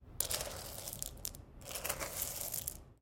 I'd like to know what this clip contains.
CoffeeBean-Scoop
Coffee bean scoop
beans, coffee, scooping, scoop